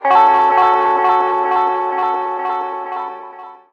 Triad F#minor chord played with an electric guitar with a bit of distortion and a strong short-time delay.